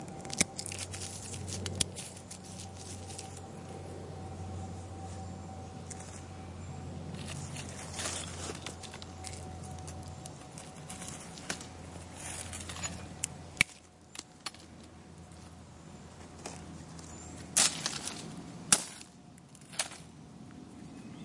Forrest Birds Twigs snapping up close Skodsborg05 TBB
Field recordings in a forrest north of Copenhagen, Denmark.